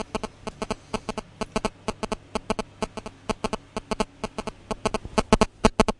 When recording switch of your mobile phones. This is the sound of a mobile phone interference with recording gear.
annoying, beep-beep, mobile-phone